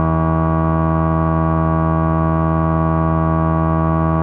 micromoog raw 15 res
A saw wave with high filter resonance from my Micromoog. Set root note to E2 +38 in your favorite sampler.